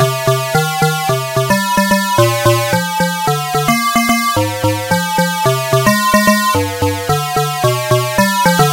Part of the Beta loopset, a set of complementary synth loops. It is in the key of C minor, following the chord progression Cm Bb Fm G7. It is four bars long at 110bpm. It is normalized.
synth, 110bpm